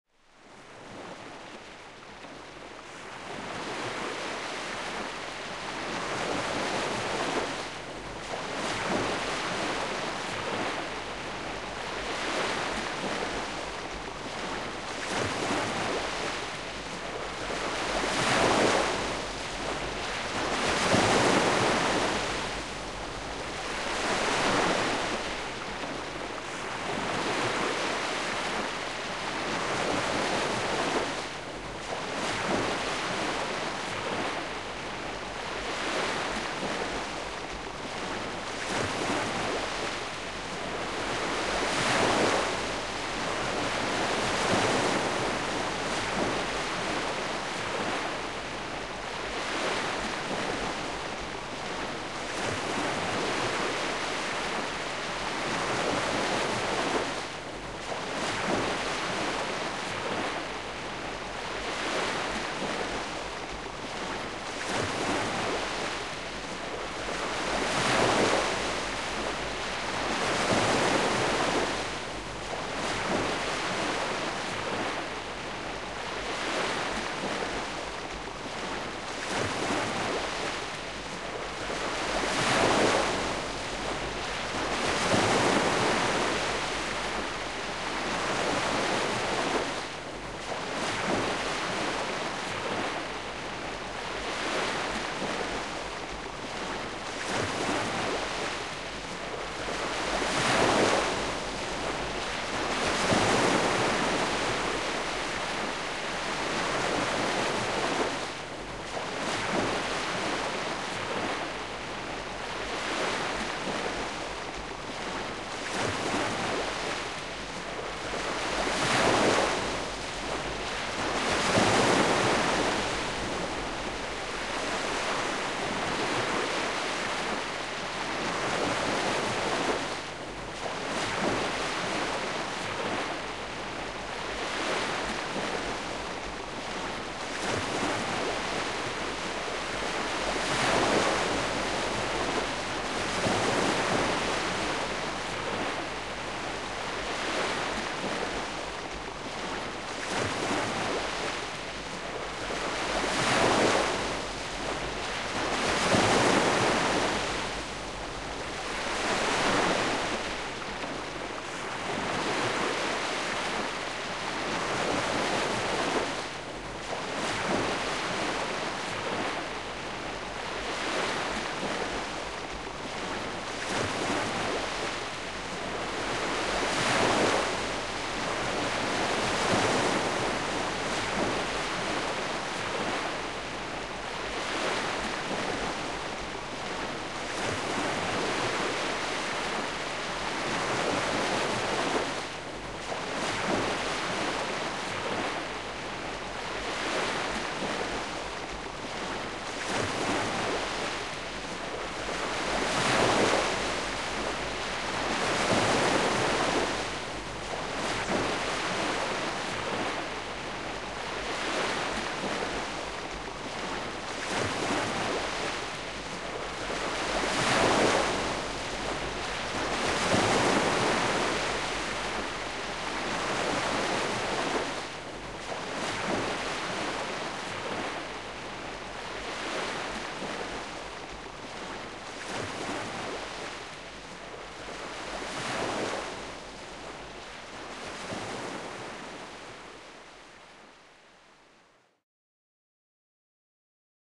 Seawash (calm)
Calm sounds of the sea.
calm, nature, ocean, ocean-sounds, sea, sea-sounds, wash, water, waves